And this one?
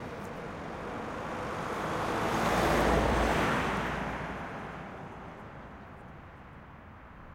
MERCEDES passing slow
Mercedes passing in front of a MS sett (sennheiser mkh 30 an mkh 50), this is allredy downmiksed so noe plugins needed.